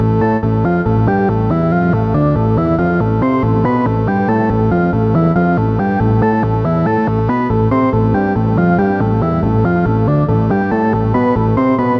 Analog Sequence Melody (Am - 140)
Analog Sequence Melody Key: Am - BPM: 140
Analog, Arp, Cinematic, Film, Loop, Melody, Movie, Sequence, Synth